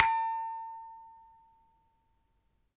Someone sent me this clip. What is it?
Sample pack of an Indonesian toy gamelan metallophone recorded with Zoom H1.
gamelan, hit, metal, metallic, metallophone, percussion, percussive